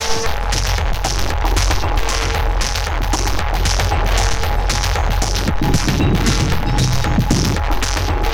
115 BPM STAB LOOP 31 mastered 16 bit
I have been creative with some samples I uploaded earlier. I took the 'STAB PACK 01' samples and loaded them into Battery 2 for some mangling. Afterwards I programmed some loops with these sounds within Cubase SX. I also added some more regular electronic drumsounds from the Micro Tonic VSTi.
Lot's of different plugins were used to change the sound in various
directions. Mastering was done in Wavelab using plugins from my TC
Powercore and Elemental Audio. All loops are 4 measures in 4/4 long and
have 115 bpm as tempo.
This is loop 31 of 33 with heavy distorted beats. Pure core.
115bpm, dance, drumloop, electronic, loop, weird